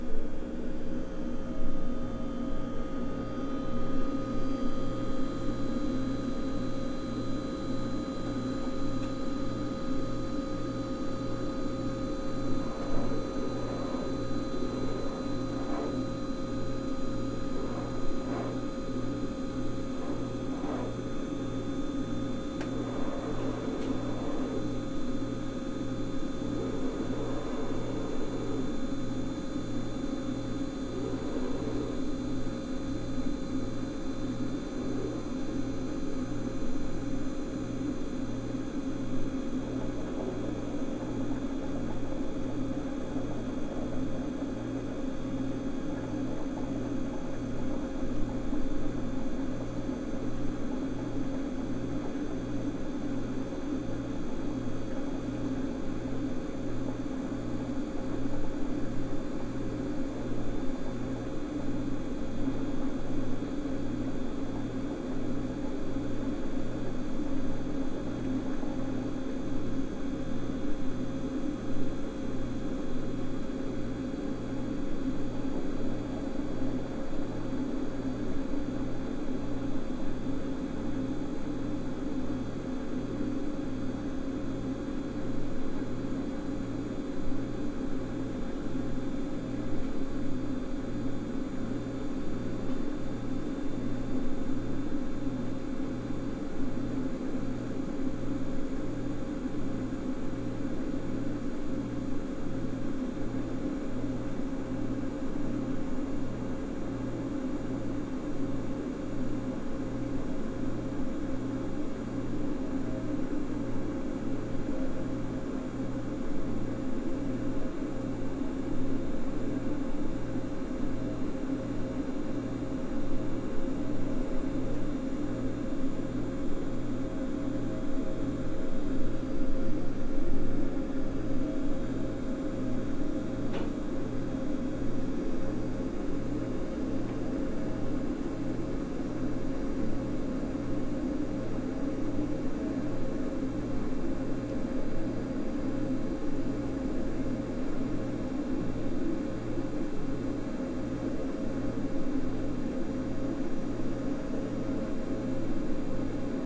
Fluoresent Light Hum and Refrigerator
This is a recording of an office refrigerator in a room with fluorescent lights. It was recorded with a Sennheiser ME66 and a Tascam DR-60D.
fluorescent, fluorescent-light, fridge, hum, light, lights, refrigerator